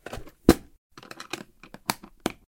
Plastic toolbox C
box, close, closing, open, opening, toolbox